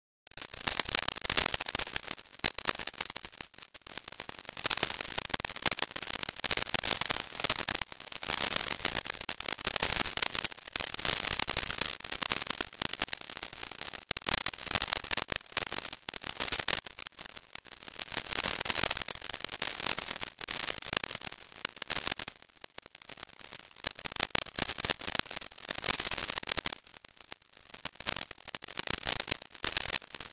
Electrified granules v1
Synthesized granular noise